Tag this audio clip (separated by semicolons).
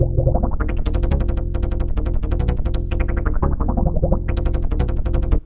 bass; loop